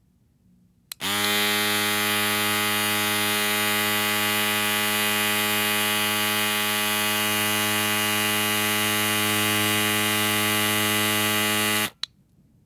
A recording of an electric razor my friend and I made for an audio post project